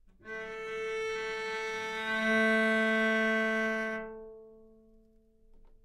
Cello - A3 - other
Part of the Good-sounds dataset of monophonic instrumental sounds.
instrument::cello
note::A
octave::3
midi note::45
good-sounds-id::446
dynamic_level::p
Recorded for experimental purposes
cello, single-note, multisample, neumann-U87, good-sounds, A3